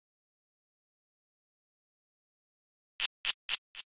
Exotic Electronic Percussion39
electronic, percussion, exotic